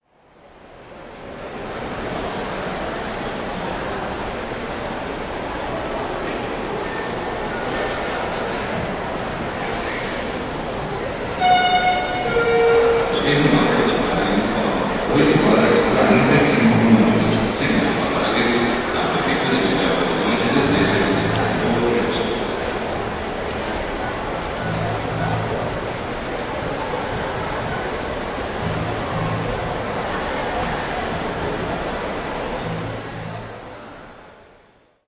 09091600 BeloHorizonte BusTerminal
Announcement at the bus terminal in Belo Horizonte, Brazil
field-recording, belo-horizonte